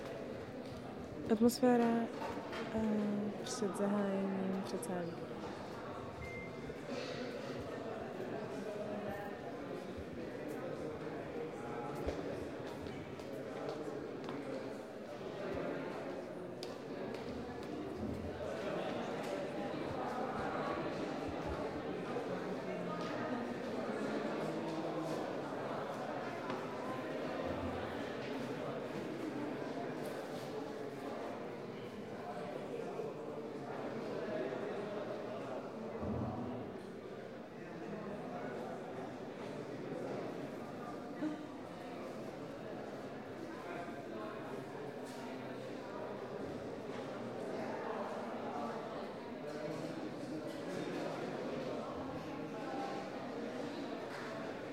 people speaking in a hall
People talking, hall ambience
ambiance, ambience, big, chatter, chatting, crowd, field-recording, general-noise, hall, people, talking, voices